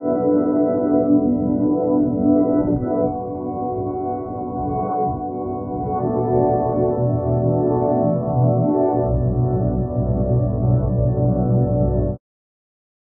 lounge organ loop 16x80bpm var2
Hourglass applied to synthesized organ gibberish; 16 bars of 80 bpm with 1-second tail. Slight variation—another rendering with the same parameters.
80-bpm, organ, granular